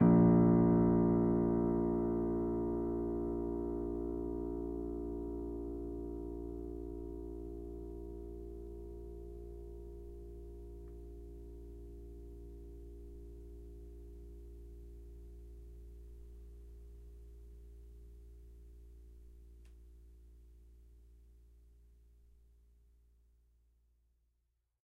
upright choiseul piano multisample recorded using zoom H4n
choiseul, multisample, piano, upright